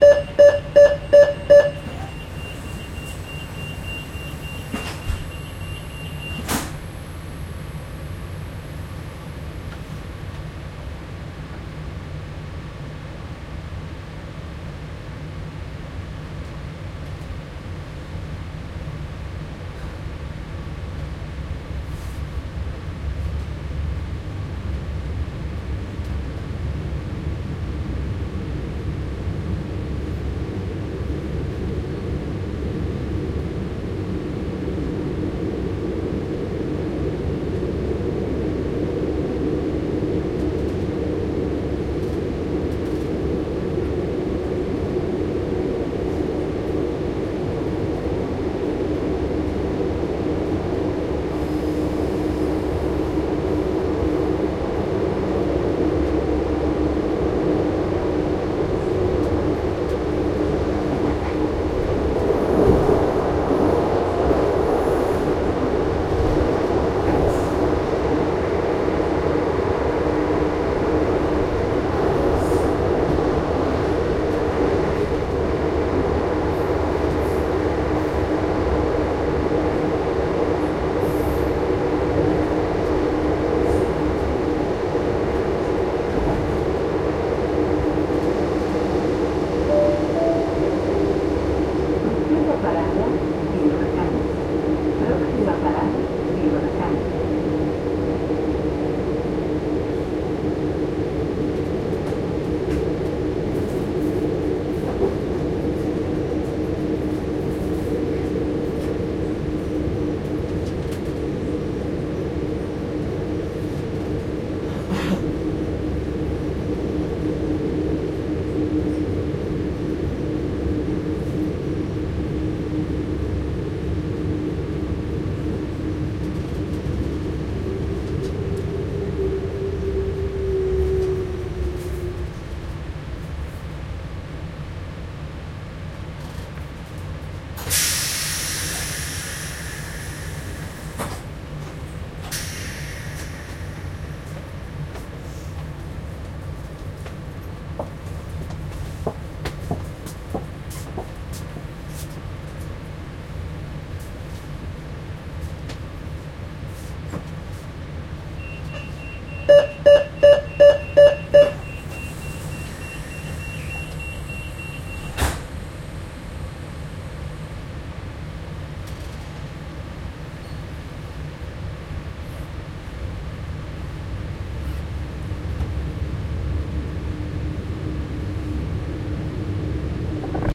taking the train to Sitges
hum, inside, train